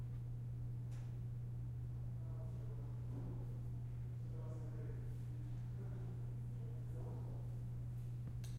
Elevador hall Roomtone
elevator, hall, room-tone